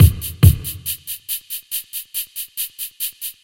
4 Beat 07 minimal
8 Beat Drum Loop
beat, drum-loop, drums, loop, Trip-hop, Triphop